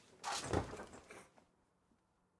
Jumping on a Bed